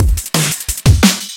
Combo Break 1
Combination break made in FL Studio 20.